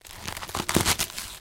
Some gruesome squelches, heavy impacts and random bits of foley that have been lying around.
blood, foley, splat, squelch, death, mayhem, gore